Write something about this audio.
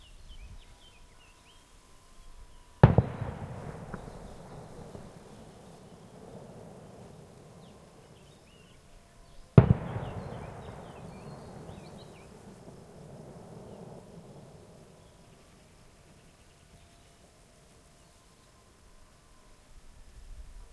2 knallen voor SanJulian
Field-recording from north of Spain with two large firework cracks rolling along the hillside and fading, bird singing, faint church bells, a little noisy recording